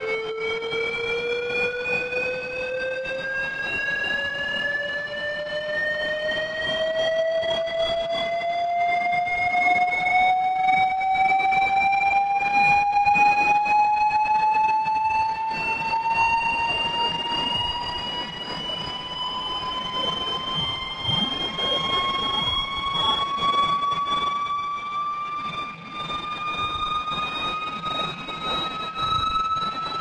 Increasing in pitch and pulsing over a 30-second period. Part of the 'Rise' sample pack.
distortion, rise, electronic, crescendo